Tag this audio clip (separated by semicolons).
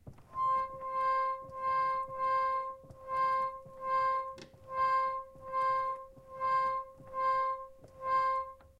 note; organ